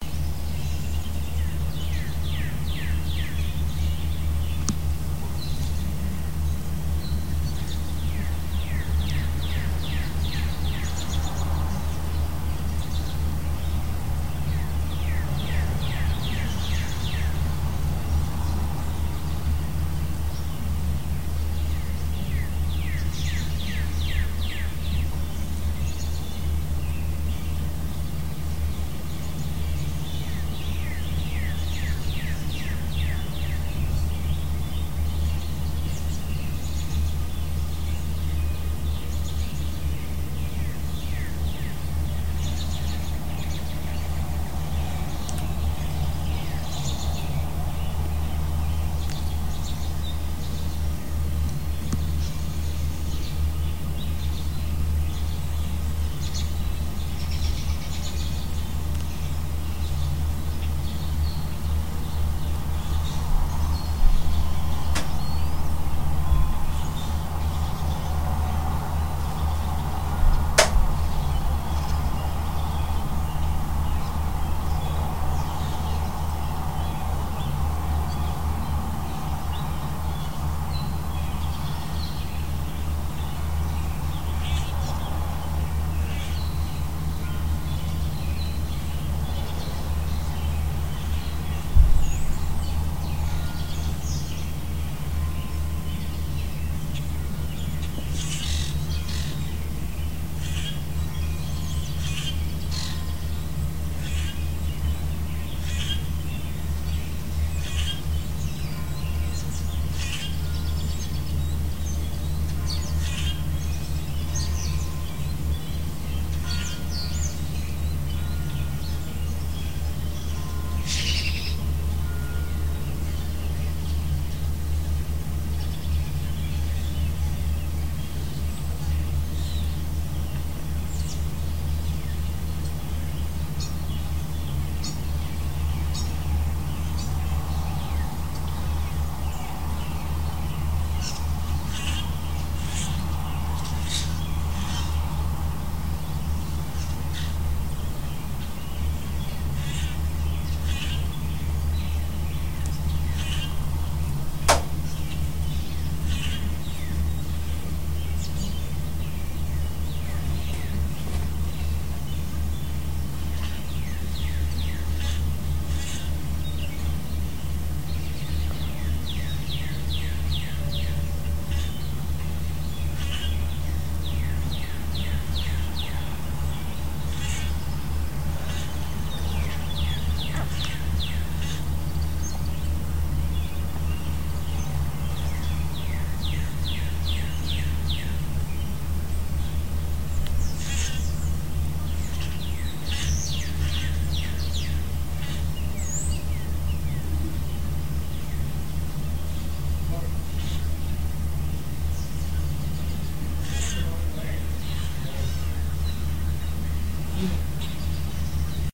Morning Birds - churchbell 03-31-2016
Birds and a church bell recorded outside my window in Atchison Kansas at around 07:00 AM. Recorded with Lifecam HD 3000 as close to the window across the room from my computer as I could get. Birds heard: robin, grackle, red cardenal. The church bell was probably the convent/college a few blocks away, maybe striking Matins because it wasn't the usual clock striking the time, as it struck more than 7 times.
Atchison, Kansas, ambience, ambient, american-robin, bell, bird, bird-song, birds, birdsong, cardenal, church-bell, churchbell, distant, field-recording, grackle, morning, nature, northeast-kansas, red-cardenal, robin, spring, united-states, us